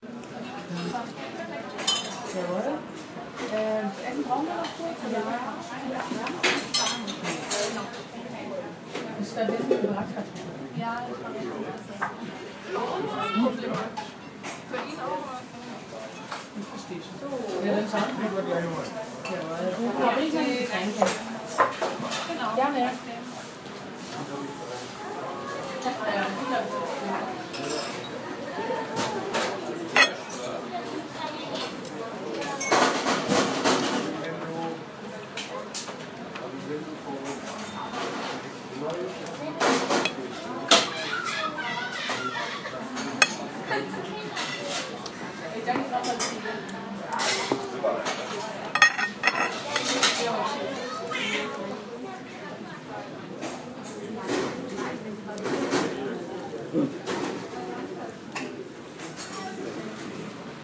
Nice Restaurant In Wurzberg
environment sound while lunch at a restaurant in Wurzburg, Germany
restaurant, Wurzburg